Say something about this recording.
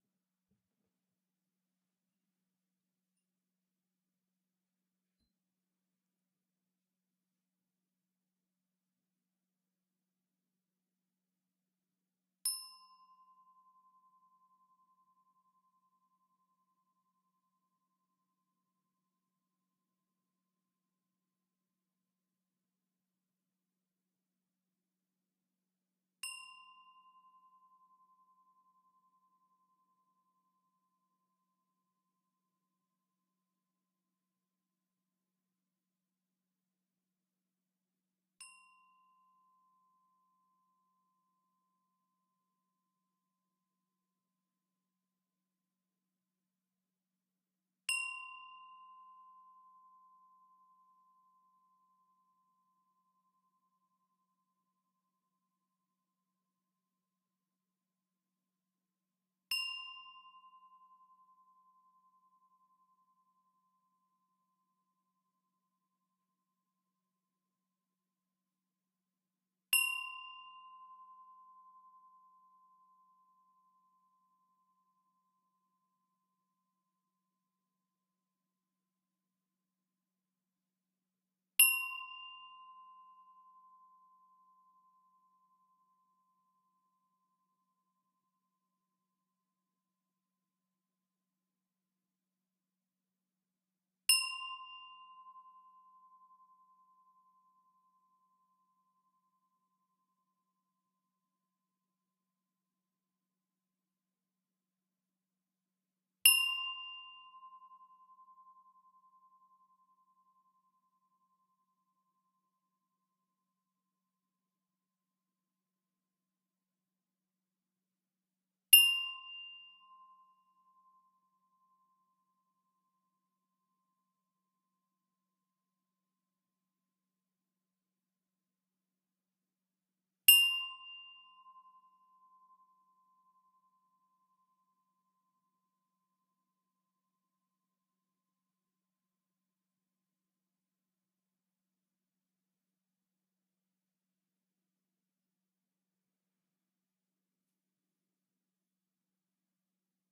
Pipe-chimes-C5-raw
Samples takes from chimes made by cutting a galvanized steel pipe into specific lengths, each hung by a nylon string. Chimes were played by striking with a large steel nail.
Chimes, Pipe, Samples